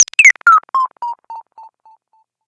Short modulated oscillations, yet another variation. A computer processing unknown operations.Created with a simple Nord Modular patch.